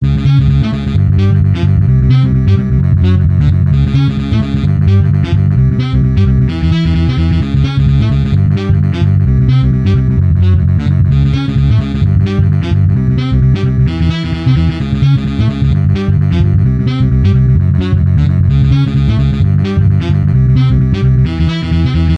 Another endless loop I have no use for but like a lot.Could be useful for a funny game or something.If this ends up in something public I'd love to see how it was used.
game, endless, music, loop, sax